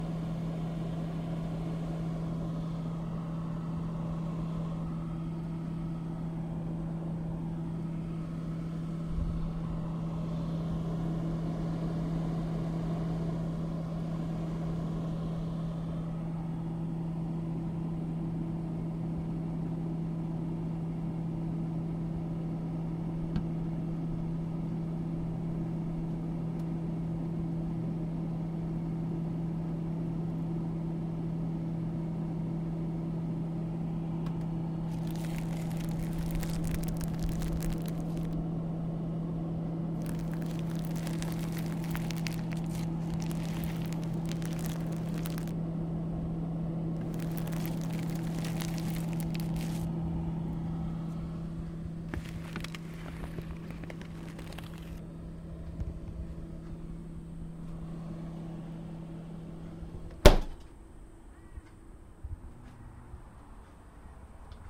UNWRAPPING SOMETHING FROZEN AND FREEZER HUM
Recorded inside a home freezer. At 35 secs the sounds of cracking open or unwrapping something frozen begin. The freezer hum is very audible throughout.
created by needle media/A. Fitzwater 2017
breaking, crack, freezer, hum, open, opening, plastic, tearing, unwrap, winter, wrapping